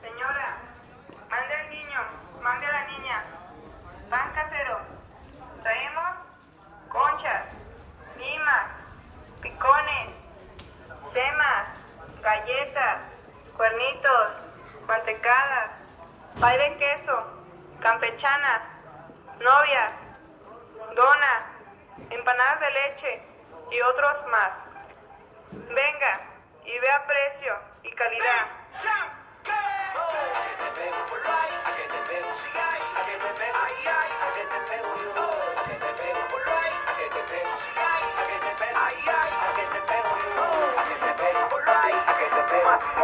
Amb:BreadTruck:CamiónDePan:Street:México
This audio was recorder with a ZOOM F4 + MKH 416, for a mexican documental of the virgin of Zapopan, in GDL,Jalisco.Mex.